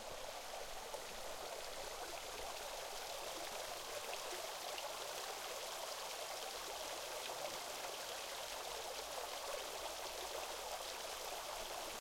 river over cascade small waterfall